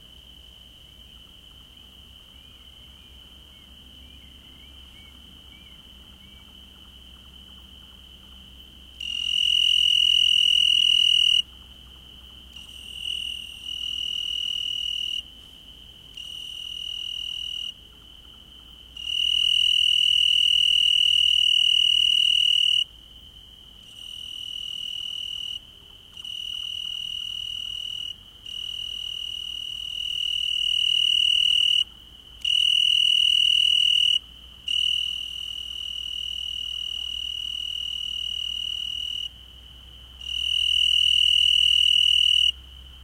20060706.night.cricket
Recorded a summer night inside Pine forest. First you hear a soft call from an unknown source (right channel), then a distant Nightjar (left), then a single, very close cricket (mind your ears!). Note that the insect calls fade in gradually but end quite abruptly. This goes unnoticed when you hear a chorus of crickets. You can also check that he creates a pattern with two very different calling intensities. Rode NT4 > Shure FP24 > iRiver H120(rockbox)
ambiance, field-recording, forest, nature, night, summer